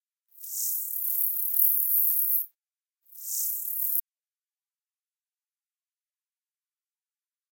a different kind of alien communication